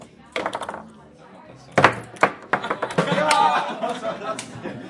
20141126 footballtable H2nextXY
Sound Description: Ball, Schuss, Torjubel
Recording Device: Zoom H2next with xy-capsule
Location: Universität zu Köln, Humanwissenschaftliche Fakultät, HF 216 (UG, Café Chaos)
Lat: 6.919167
Lon: 50.931111
Date Recorded: 2014-11-26
Recorded by: Saskia Kempf and edited by: Tim Meyer
Cologne, University, Field-Recording, sports, activity